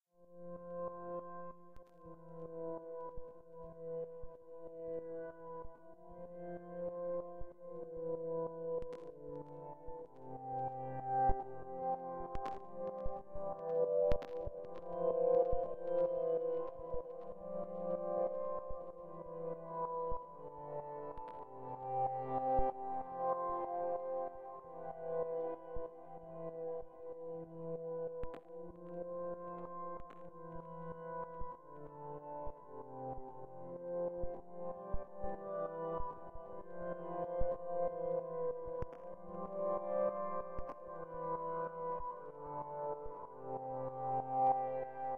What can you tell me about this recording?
85 bpm- pad
A melancholic Theme by using a basic Pad from Absynth 5. It was made in Bitwig Studio 1. I wan´t to do a Hip Hop Oldschool Project with. There´s an other 85 bpm´s Sound in the Track Packs to get the comparing Drums.
melancholic, Pad, Chords